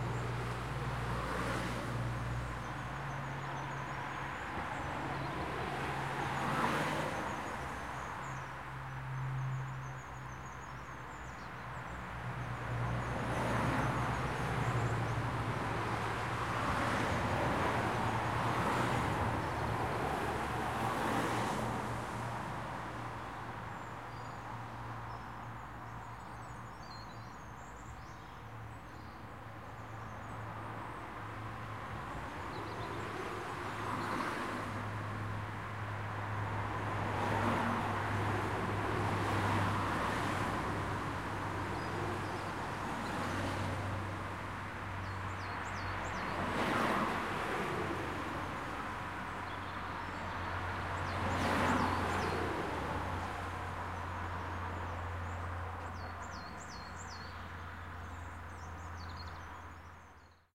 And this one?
Residential Traffic
Ambience, Residential, Suburbs, Traffic